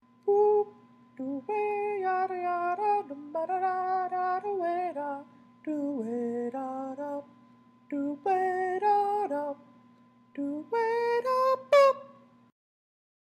voice1b pure
male falsetto singing jazzy tune
falsetto, jazz, male